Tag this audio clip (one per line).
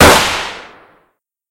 fire
firing
hunt
sniper-rifle
trenches